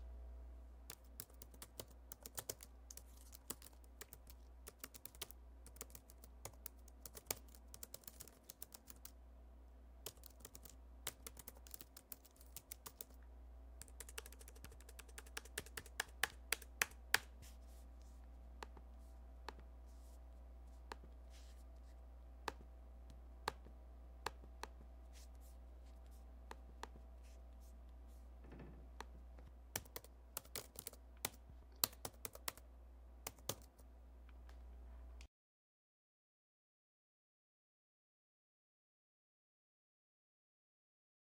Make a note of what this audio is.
Typing click computer
Typing and clicking on a macbook
click, computer, laptop, type, typing